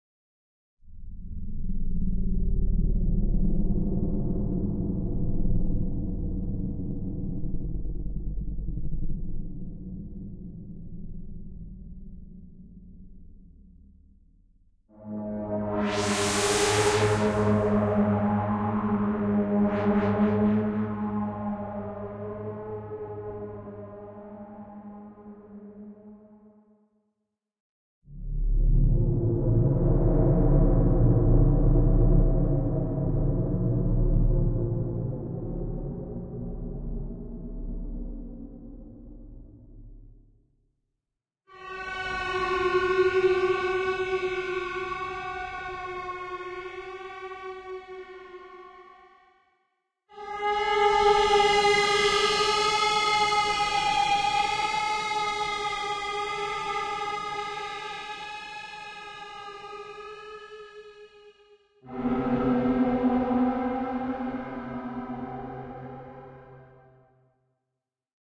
Low Sci-fi Bladerunner
Some weird sci-fi sounds created on the synth, giving me the Bladerunner vibes.